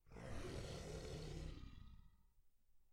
Layered SFX for a snarling dinosaur!